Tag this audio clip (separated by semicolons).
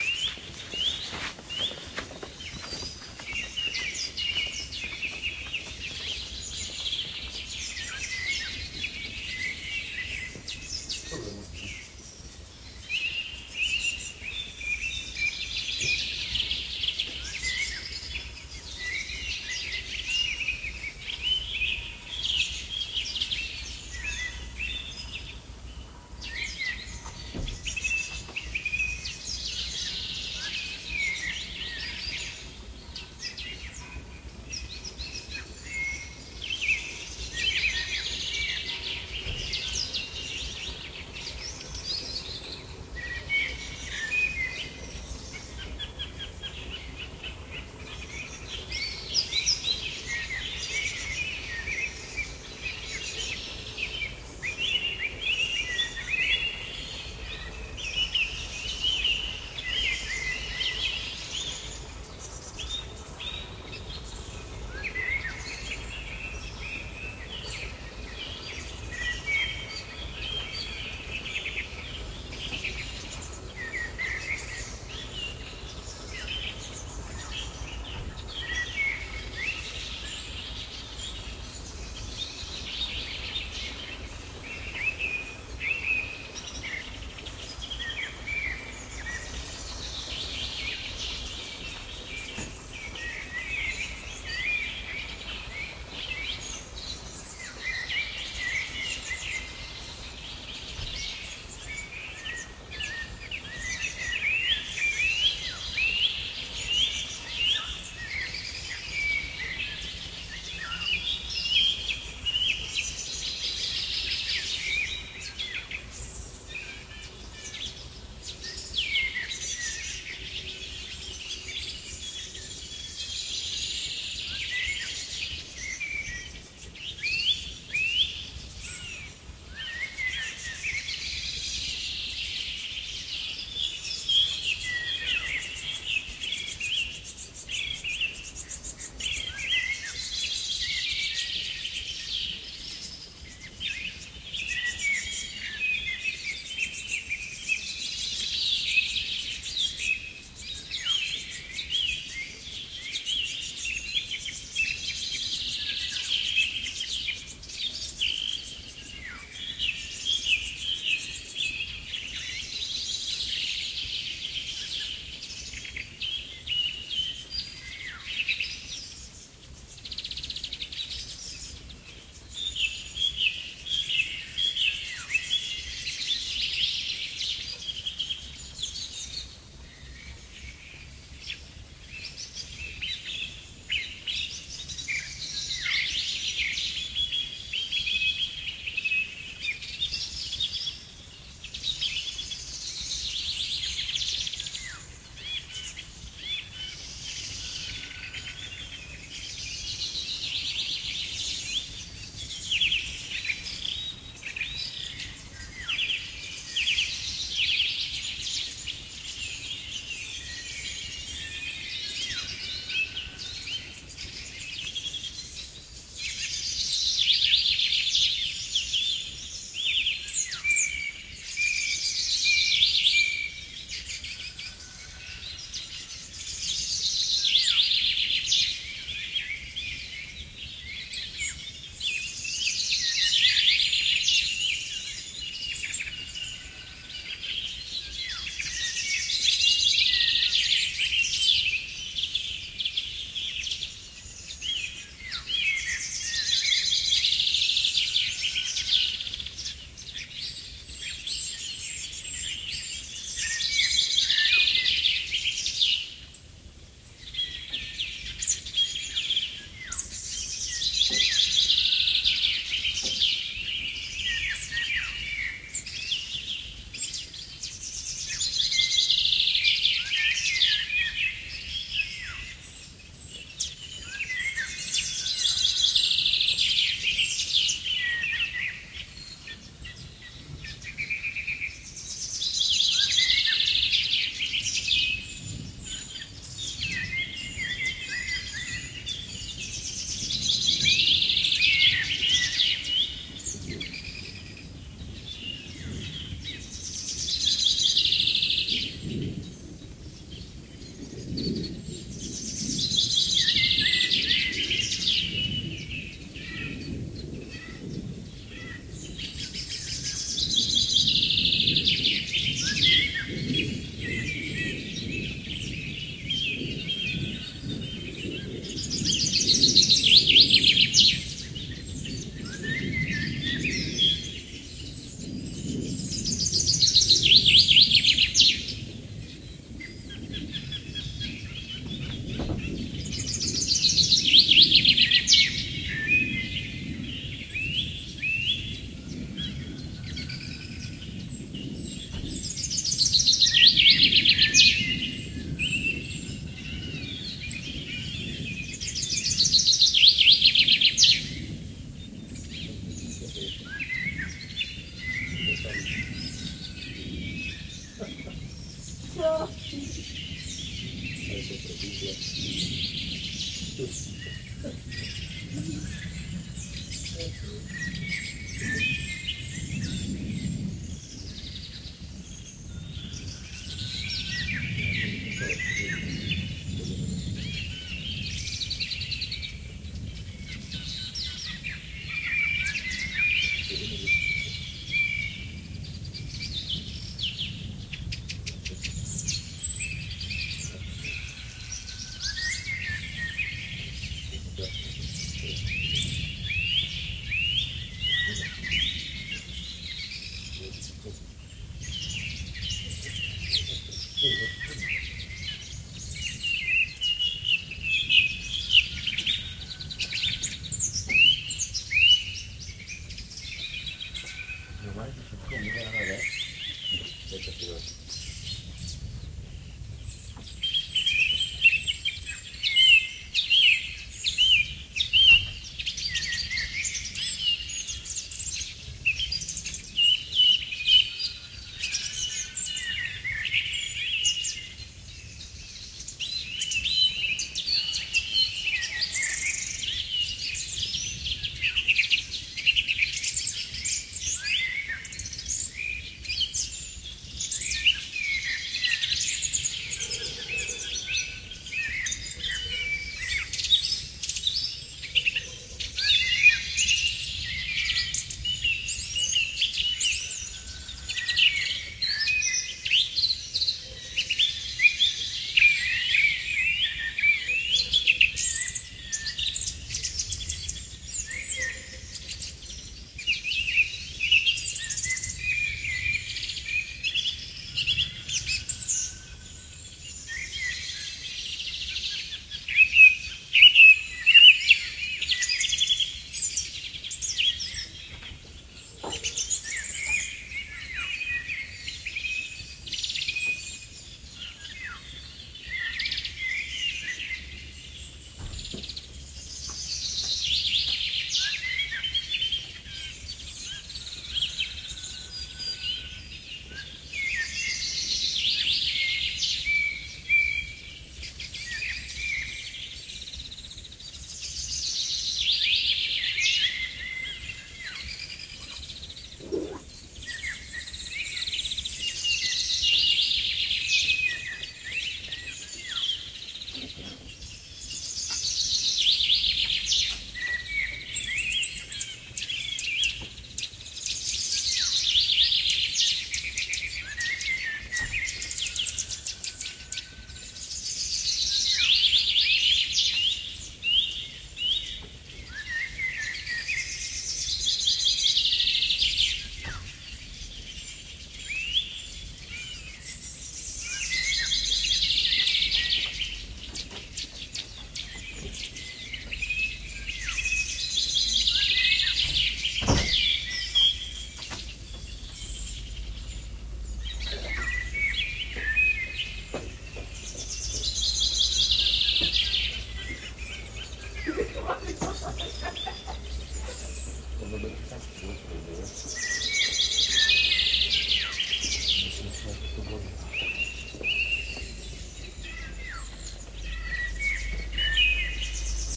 ambience; morning